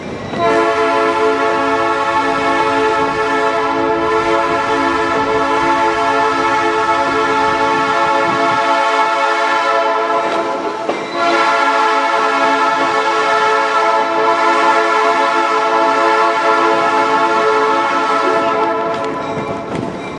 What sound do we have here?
Train with horn and bell sounds. I hope someone can use it.